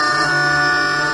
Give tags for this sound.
fx glitch pitch pitched processed strech time vocal

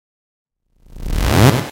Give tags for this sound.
electro fx hardstyle hit house stab

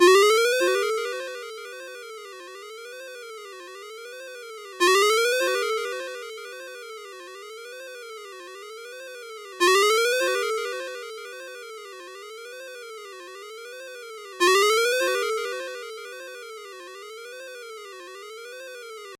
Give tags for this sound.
alert alerts arp16 cell cell-phone cellphone mojo mojomills phone ring ring-tone ringtone

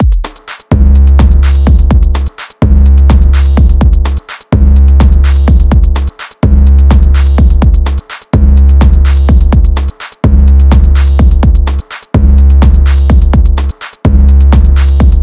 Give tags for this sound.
club bass 808 loop techno drums drum dance beat hard house trance electronic

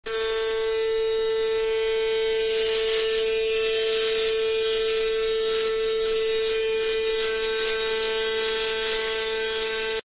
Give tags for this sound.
auto-shaver shave shaver shaving

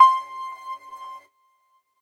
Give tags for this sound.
lead overdriven

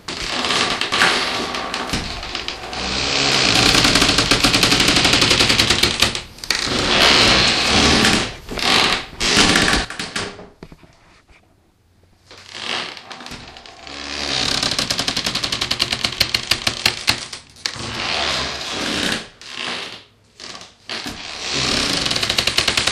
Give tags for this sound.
bathroom creak door field-recording interior outhouse porta-potty

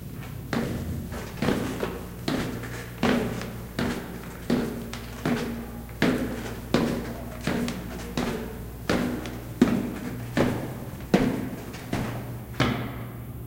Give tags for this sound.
footsteps stairs

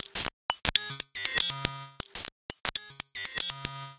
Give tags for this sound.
Abstract Loop Percussion

